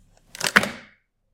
Plastic Window Handle Unlock, very satisfying "chunk" sound. (IMO the best I recorded that day)